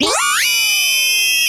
A Casio CZ-101, abused to produce interesting sounding sounds and noises
casio, crunchy, cz, cz101, glitch